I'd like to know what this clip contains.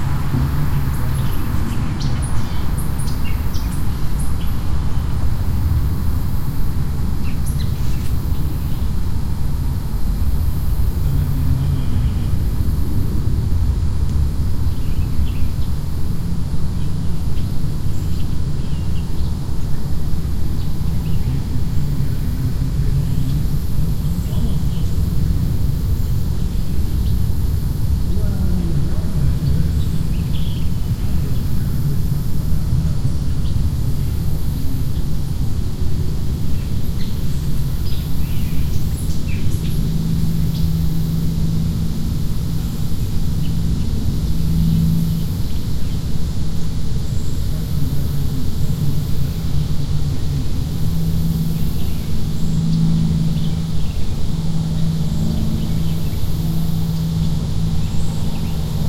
amibence 5 w birds
Ambience with distant rumble, announcer in the background, birds, cicadas.
Part of a series of recordings made at 'The Driveway' in Austin Texas, an auto racing track. Every Thursday evening the track is taken over by road bikers for the 'Thursday Night Crit'.